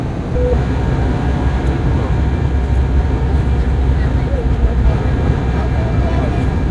Aircraft inside, passenger seatbelt signal
Inside an Aircraft, low engine noise and passenger seatbelt signal
inside, signal, Aircraft, interior, seatbelt, passenger